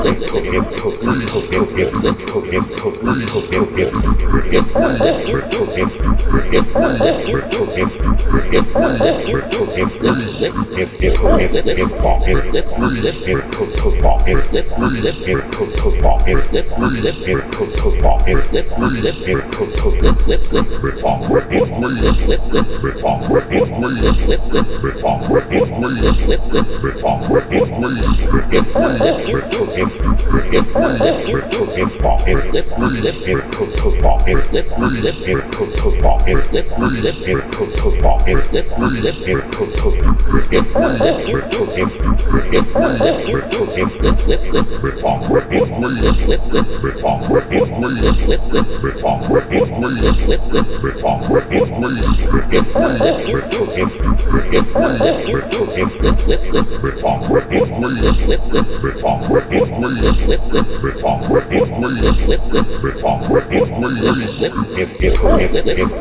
Groovetronix Beatomatic 120 BPM
I created this annoying torture specifically designed to target the human ear drum utilizing the Groovetronix Beatomatic 5000xl.
loop, hip, club, rock, rhythm, bpm, hydrogen, house, jazz, dub, hop, trap, techno, edm, beat, rap, step, Drum